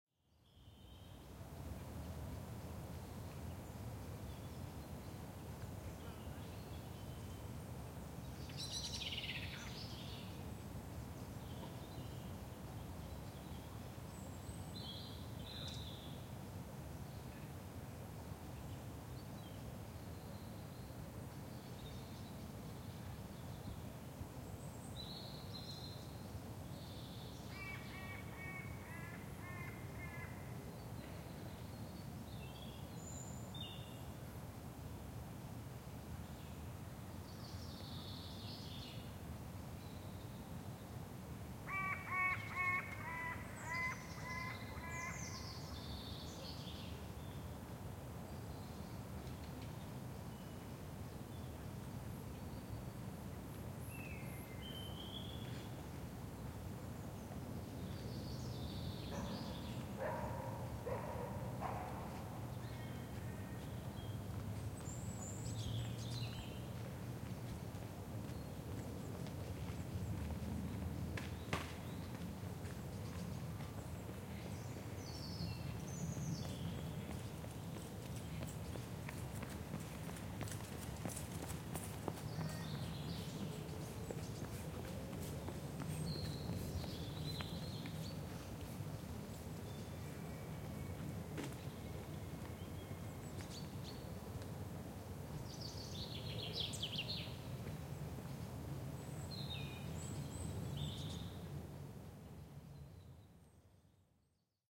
Recording of general ambience in Hampsted Heath near London, UK.